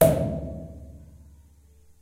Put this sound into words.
Cork pop
cork plopp plop